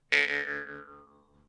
jaw harp21
Jaw harp sound
Recorded using an SM58, Tascam US-1641 and Logic Pro
boing, bounce, funny, jaw, twang